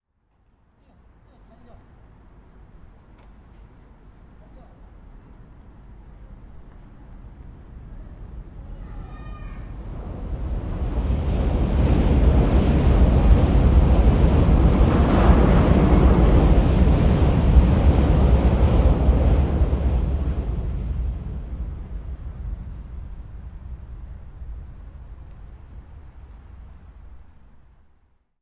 0310 Train over bridge from below 4
Train, metro passing over the bridge, recording from below the bridge.
20120616